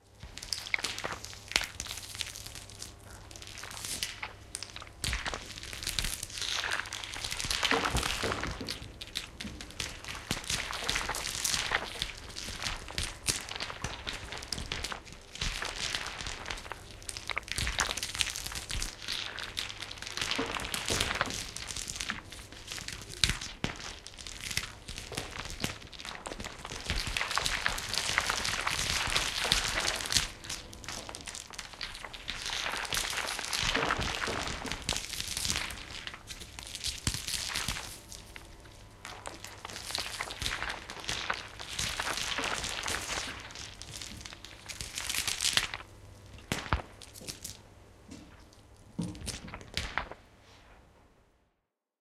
cauliflower compilation2
The cauliflower samples from the vegetable store sample pack were compiled in a one minute arrangement. Some pitch-alterations (mainly lowering randomly per track) were added in busses for the broader sounds. Furthermore a reverb to juicy it up.
vegetable, raunched, filth, processed, debris, dirth, horror, water